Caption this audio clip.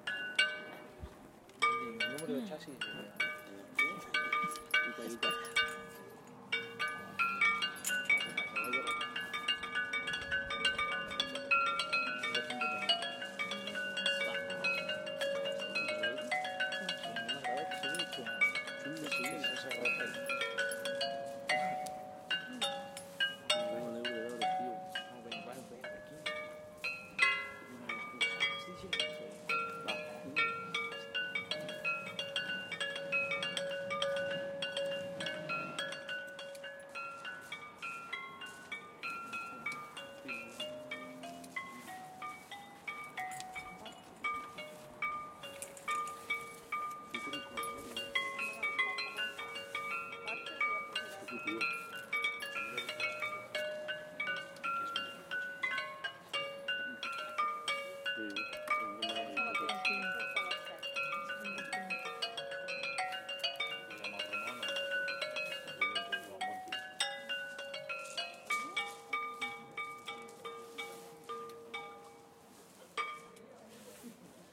Xylophone played in the distance by two musicians. This was recorded in El Konvent, Berga, Catalunya with a Zoom H4n Pro. (17-03-2017)